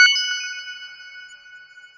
Ableton Game Sound Effects 09 02 2015 20
made in ableton live 9 lite
- vst plugins : Alchemy
you may also alter/reverse/adjust whatever in any editor
please leave the tag intact
game sound effect sfx games effects 8bit 16bit classic sample
game, games, sfx, effect, sample, classic, 8bit, effects, sound, 16bit